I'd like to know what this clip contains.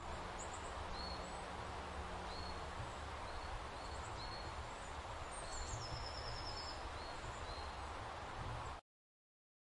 This track is the ambience of a forest.